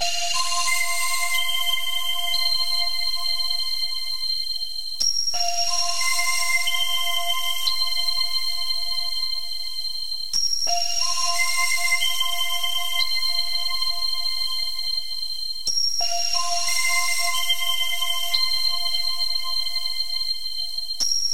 Amazing Sinebell (Ethereal)
Sinebell (Ethereal) made in Serum.
Dance, EDM, Electric